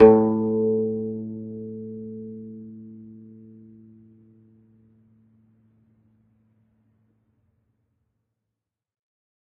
single string plucked medium-loud with finger, allowed to decay. this is string 6 of 23, pitch A2 (110 Hz).